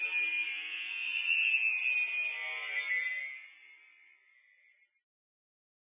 long scream on telephone
A long scream on a telephone. I used Wavepad to edit it. Could be used for horror clips.